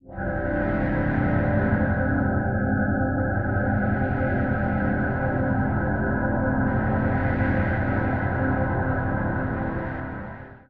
Layered pads for your sampler.Ambient, lounge, downbeat, electronica, chillout.Tempo aprox :90 bpm
electronica, pad, sampler, layered, downbeat, ambient, texture, synth, chillout, lounge